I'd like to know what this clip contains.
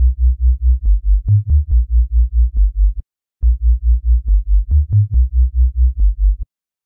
basswobble2step
Bass wobble that oscillates every 2 beats at 140bpm.
140, 2step, 320, bass, dubstep, future-garage, wobble